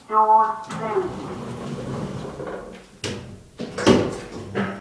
lift doors closing 02
'doors closing' announcement and lift doors shutting.
announcement, close, closing, door, doors, elevator, kone, lift